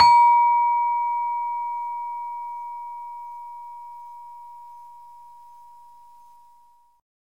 Sample of a saron key from an iron gamelan. Basic mic, some compression. The note is pelog 6, approximately a 'Bb'
saron, gamelan